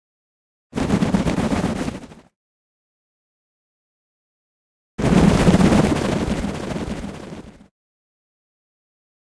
Lintu, lentoonlähtö, siivet / Bird, takeoff, wings flapping, a big bird, capercaillie
Iso lintu, metso, lähtee lentoon, siivet lepattavat.
Paikka/Place: Suomi / Finland / Vihti, Konianvuori
Aika/Date: 22.01.2001